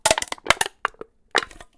can crush 01
Variant 1 of a can being crushed recorded with a Zoom H4n.